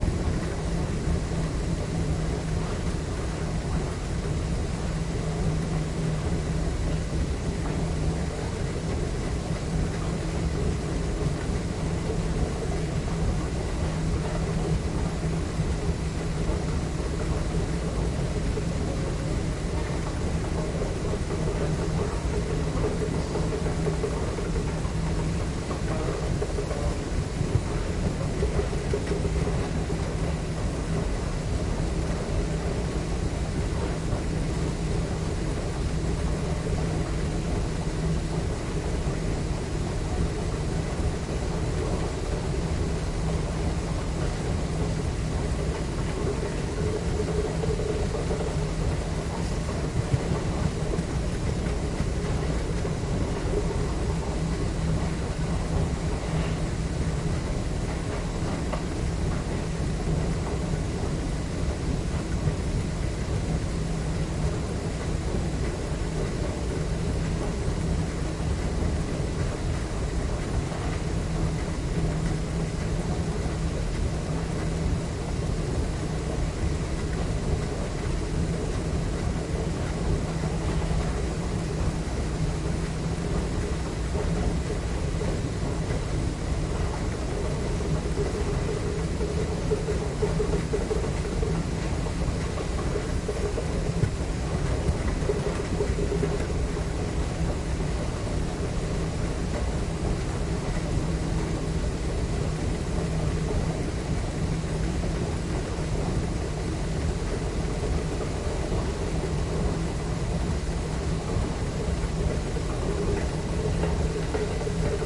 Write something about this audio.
Subway escalator near belt
Sound from subway escalator in Decatur MARTA station. Recorded on November 24, 2016 with a Zoom H1 Handy Recorder. The recorder is positioned on the metallic floor of the escalator.
ambience, escalator, field-recording, machines, metal, subway